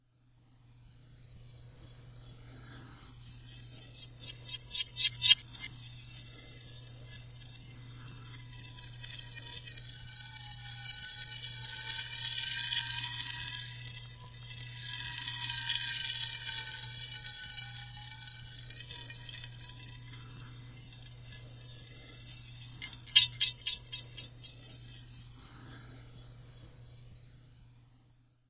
bubbles stereo 02
a sound created from bubbles of sparkling wine
dźwięk stworzony z bąbelków musującego wina
sound, experiment, effect, wine-glass, design, sparkling, soundscape, ambience, wine, bubbles, sound-design, sci-fi, atmospheric, atmosphere, experimental, ambient